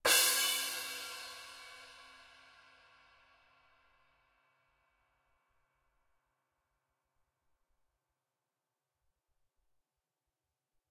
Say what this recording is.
16" stagg sh crash recorded with h4n as overhead and a homemade kick mic.